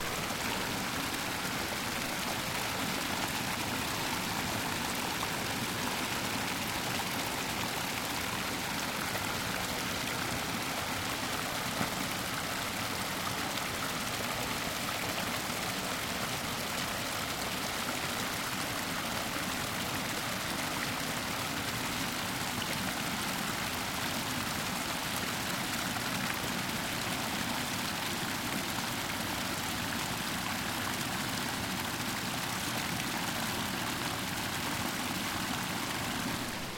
Close mic recording of a small river ford in UK